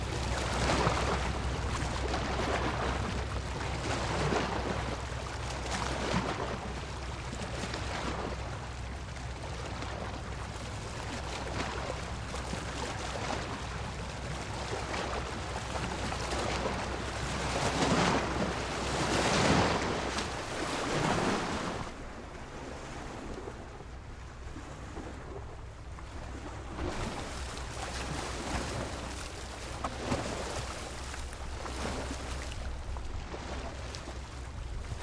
Waves on the Rocks
Recording of waves hitting the rocks. Boat engine is causing the low frequencies. Sound Devices 633/w Sennheiser MKH 416.
beach, coast, coastal, field-recording, nature, ocean, sea, seaside, shore, splash, splashing, surf, water, wave, waves